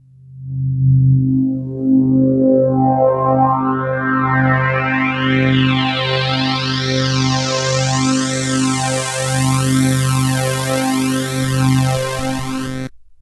A thick, rich, chorused rising filter sweep with amplitude modulation from an original analog Korg Polysix synth.
filter, slow, synth, fat, mid, thick, fx, polysix, bleep, warm, analog, rise, korg, chorus, tremolo, sweep